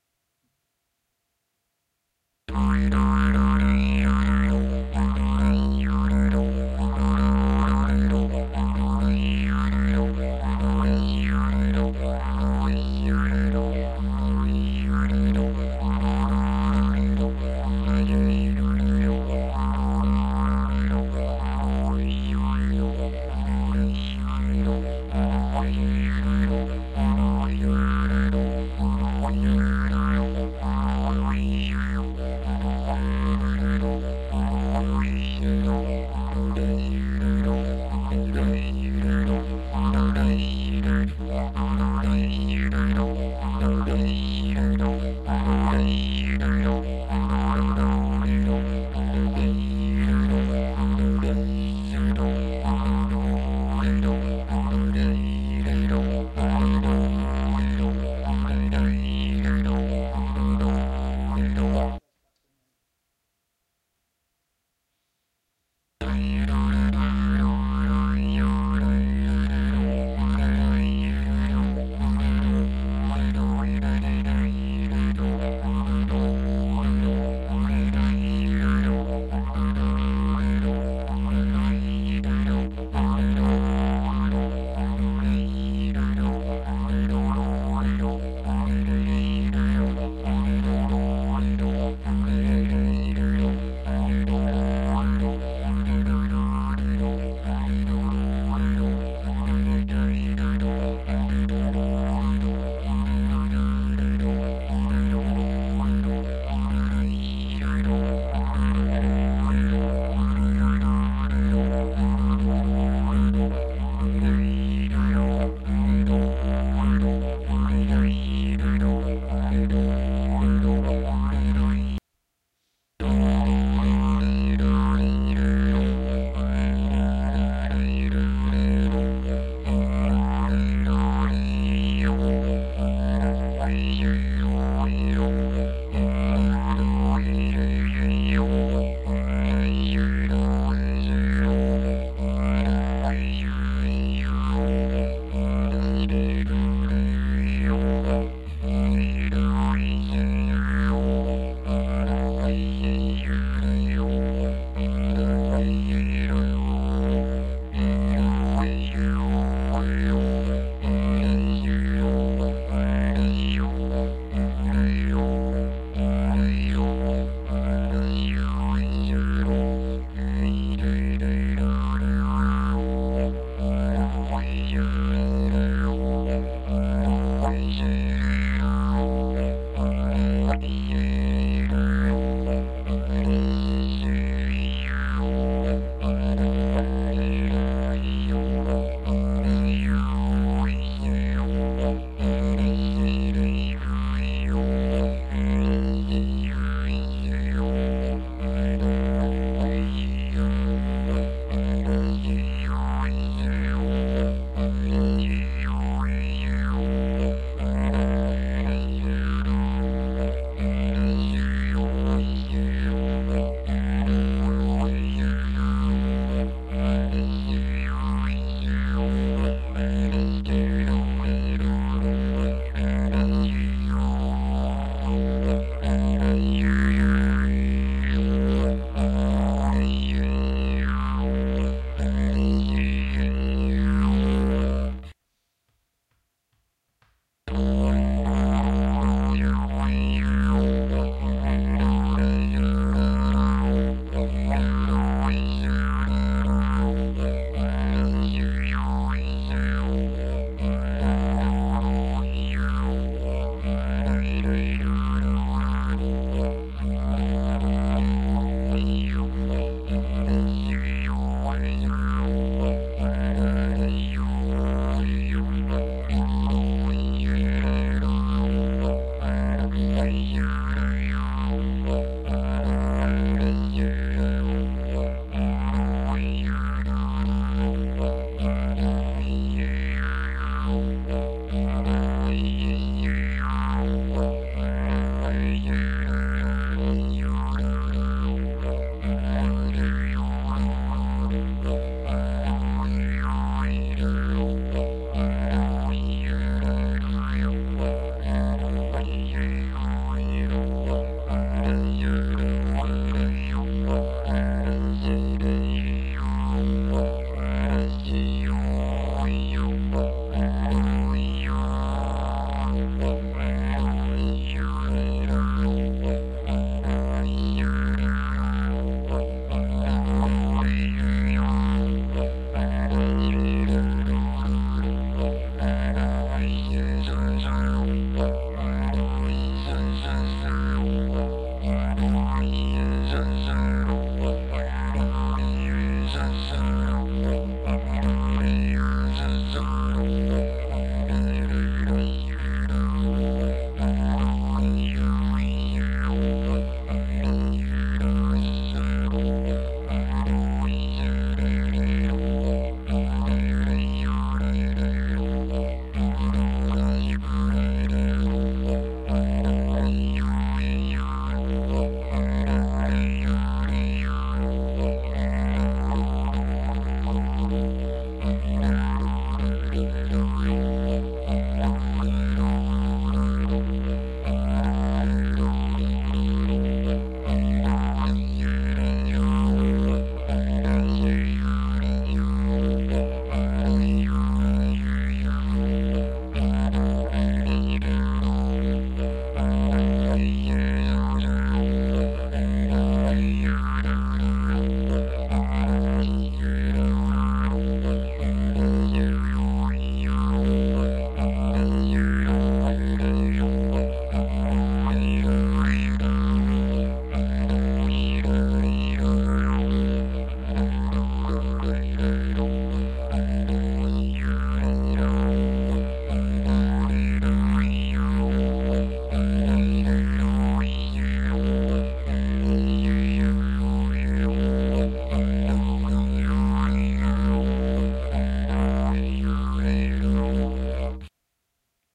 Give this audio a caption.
didge drone-rhythms
Several rhythms, spontaniously played on a didgeridu tuned in C major. No effects added, no edits made. Recorded with Zoom H2n and external Sennheiser mic. Perhaps useful as a background sound.
The money will help to maintain the website:
didgeridoo, natural, rhythm, didgeridu